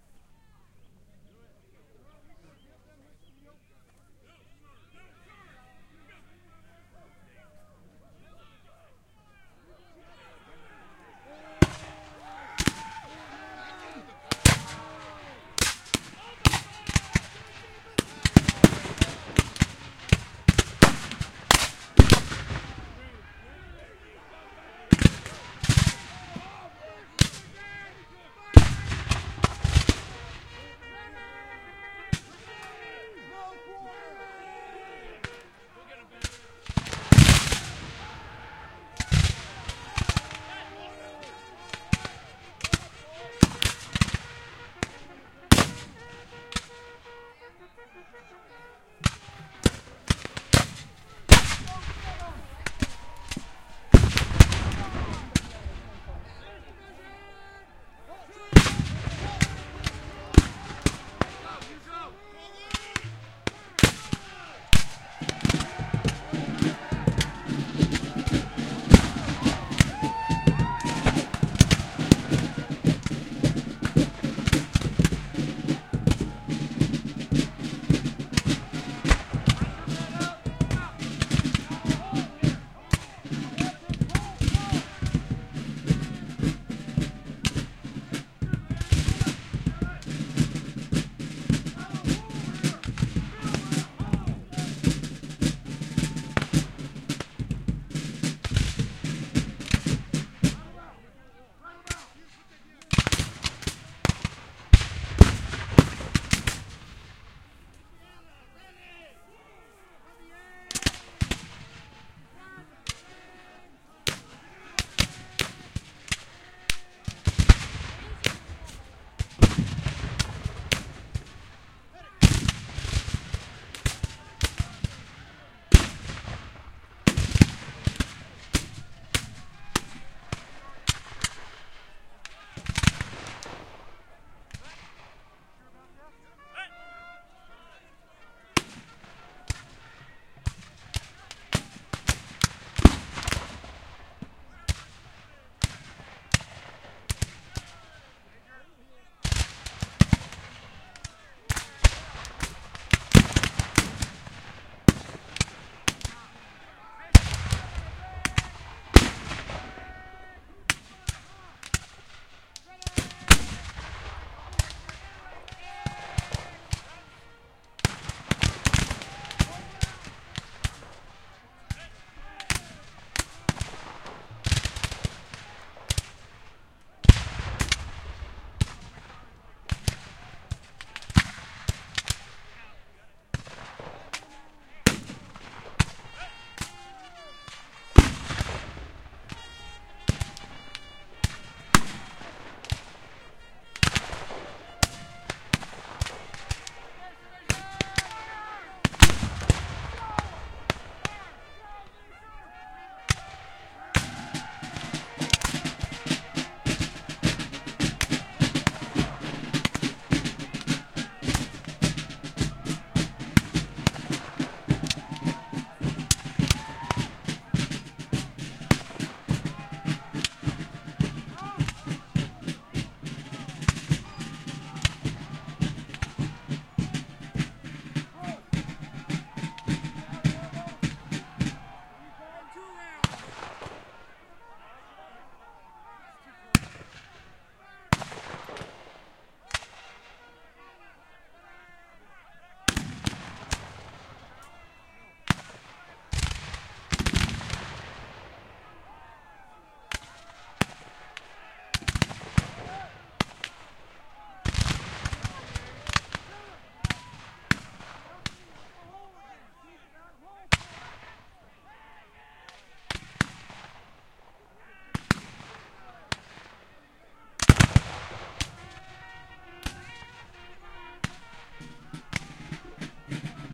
CW Battle Nearby
Recorded at a US Civil War re-enactment, Oregon, USA, 2012. Black powder rifles, cannons, almost a full battle. Lots of range. Marching past about 15 feet away, up to about a football length from the action with a Tascam DR-08.
cannon, cavalry, Civil-War, field-recording, gun, rifle, war